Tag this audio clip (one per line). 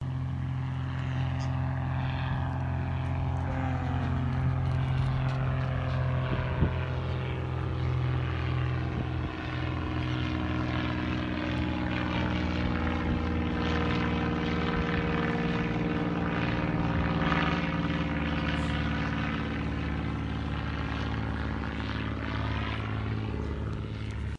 aviation
flight
air-plane
airplane
plane
flying